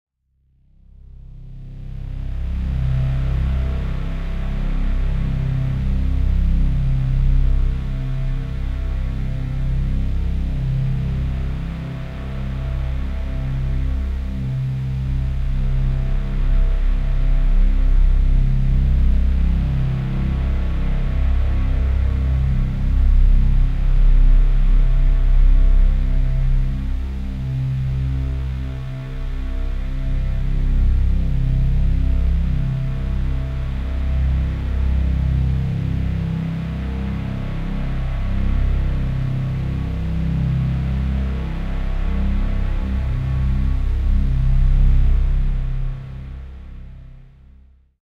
Dark Heavy Drone
Heavy distorted slowly changing drone. Key of C, 80bpm.